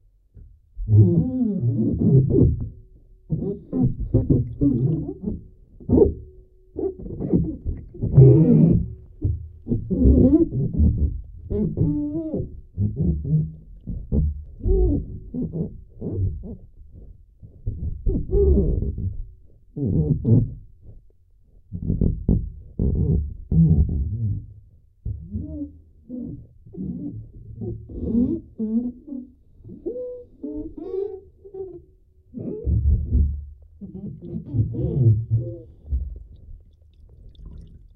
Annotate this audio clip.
Bath - Body movements underwater
Someone taking a bath - underwater recording - interior recording - Mono.
Recorded in 2003
Tascam DAT DA-P1 recorder + Senheiser MKH40 Microphone.